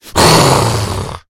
A low pitched guttural voice sound to be used in horror games, and of course zombie shooters. Useful for a making the army of the undead really scary.
Speak, videogames, arcade, games, gaming, Undead, Talk, indiedev, Zombie, Monster, sfx, gamedeveloping, Lich, Growl, Vocal, Voice, Voices, Ghoul, indiegamedev, videogame, gamedev, Evil, game, horror